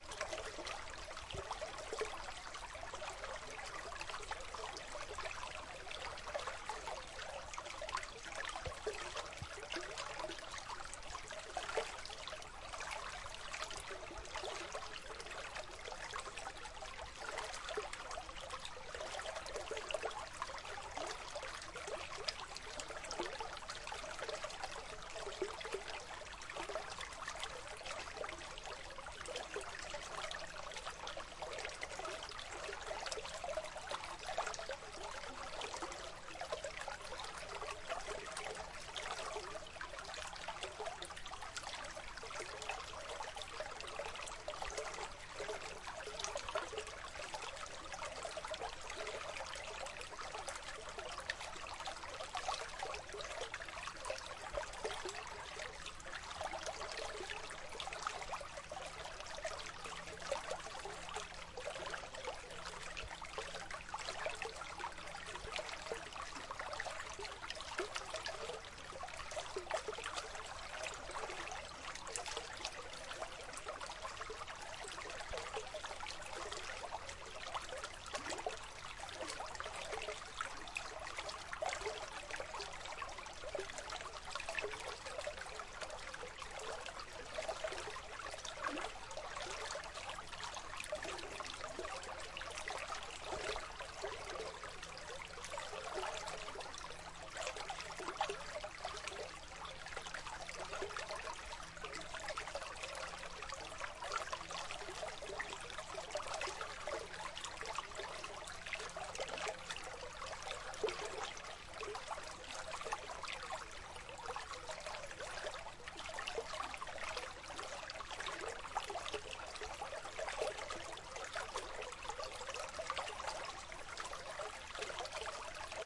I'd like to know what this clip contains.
Brook (small stream) running noisily through a cleft. Recorded on Zoom H2 in the south of sweden.